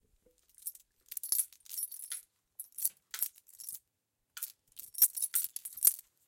Dog leash
cz, czech, dog, panska